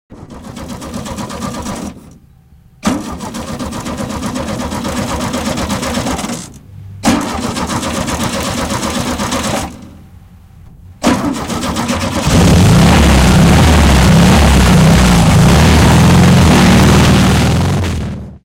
This is the start of my car old timer VW 1200 from 1963 Years. Recorded with the Shure microphone on the Akai recorder of the year 1991 in front of my garage